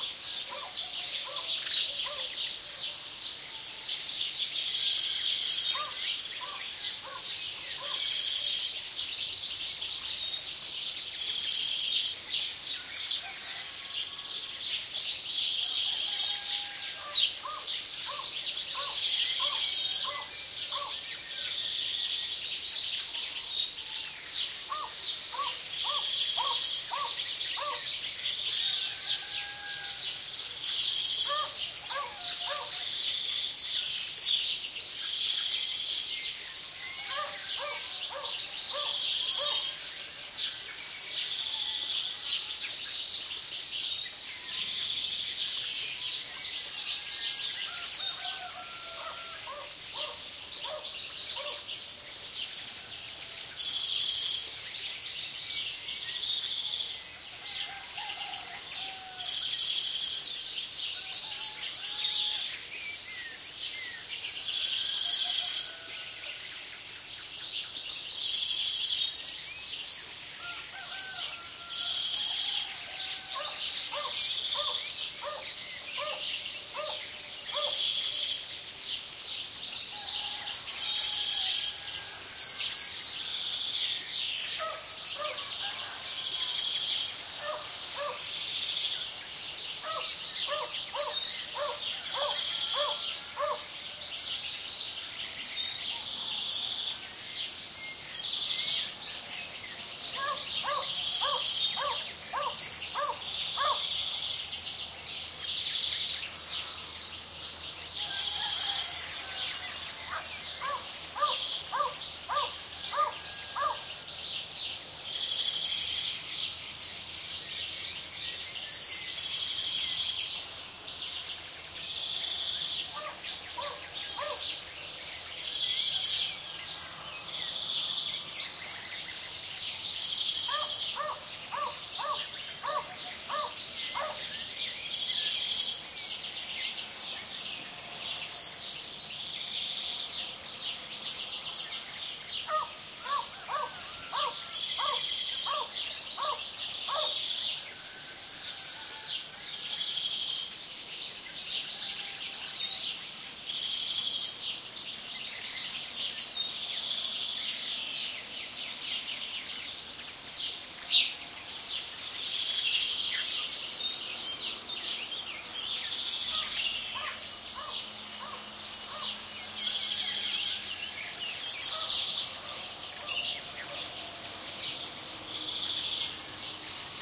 morning chorus of birds and barking dogs near Carcabuey (S Spain). Sennheiser MH60 + MKH30 into Shure FP24 preamp, Edirol r09 recorder. Decoded to mid-side stereo with free Voxengo VST plugin